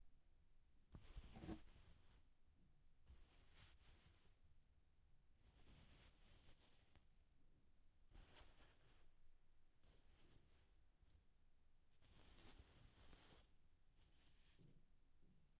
Cloth 1(rustle, fabric, clothes)
clothes, rustling